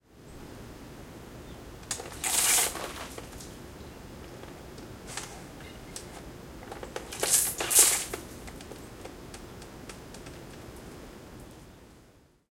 180916 Water gun squirts
Outside, noisy, a few squirts from a water gun.
water, squirt, gun